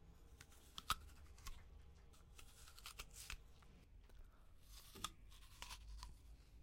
Es el sonar de tascar un trozo a la manzana